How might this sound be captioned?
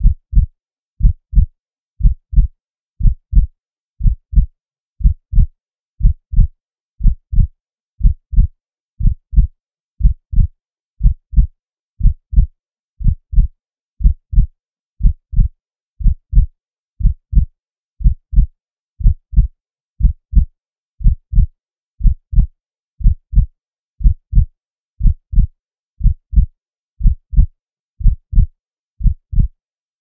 heartbeat-60bpm-limited
A synthesised heartbeat created using MATLAB. Limited using Ableton Live's in-built limiter with 7 dB of gain.
body, heart, heart-beat, heartbeat, human, synthesised